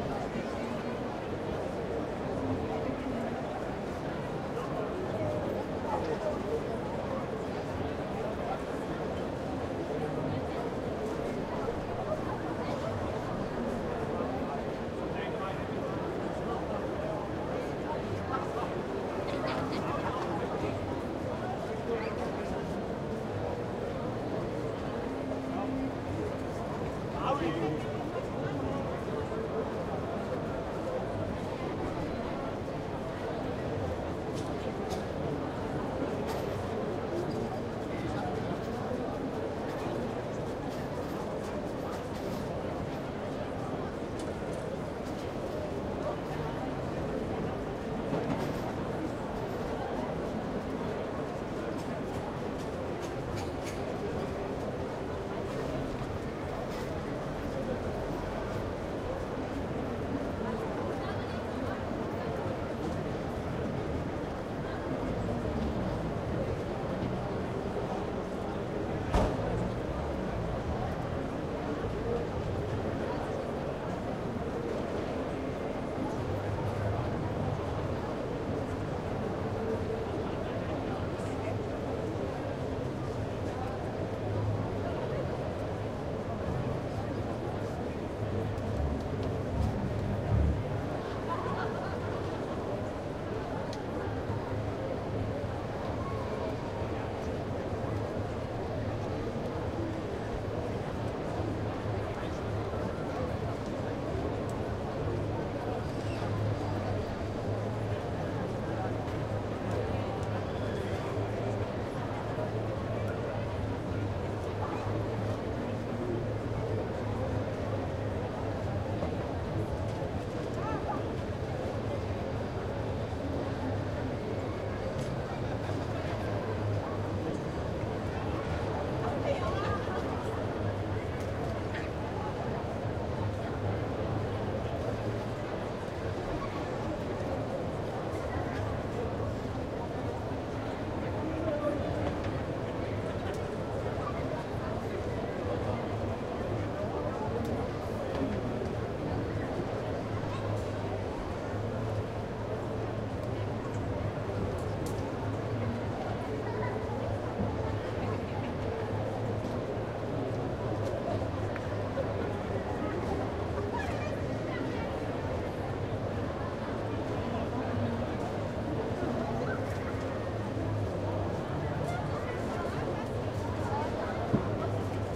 120908-1835-FG-TdS-Obermarkt
These recordings were made at the annual "Tag der Sachsen" (Saxony Fair) in Freiberg. Recordings were done on the main market square (Obermarkt), where a local radio station had set up a large stage for concerts and other events.
Recording was done with a Zoom H2, mics at 90° dispersion.
This is later in the evening, before the main venue, visitors crowd the place, you hear people talking, shouting and laughing, some children among them.